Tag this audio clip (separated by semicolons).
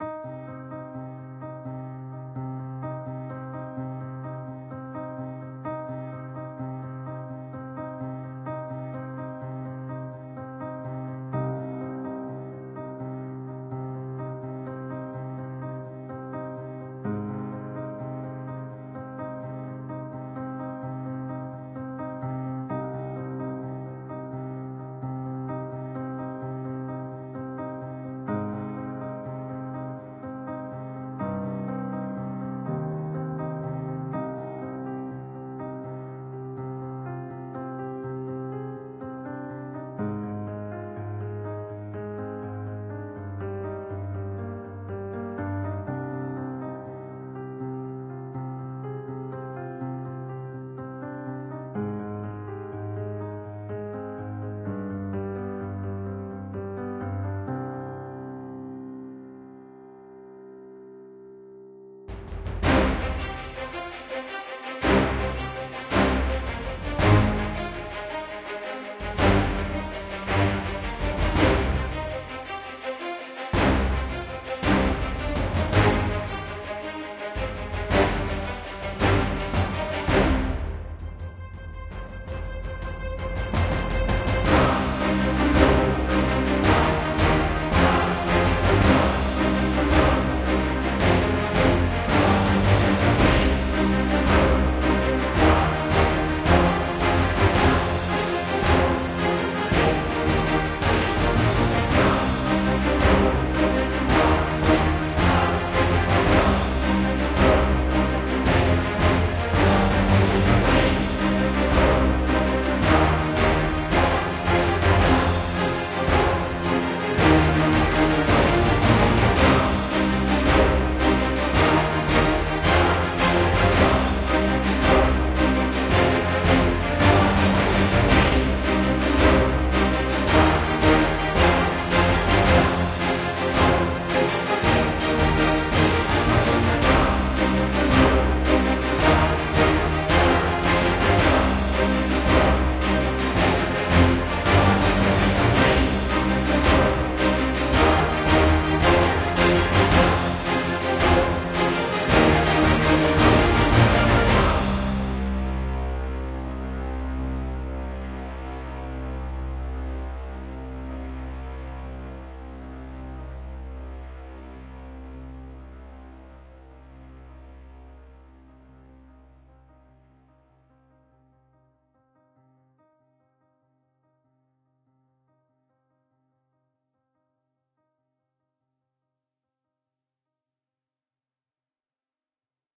Dark; Epic; Film; Film-Score; Movie-Score; Orchestral; Strings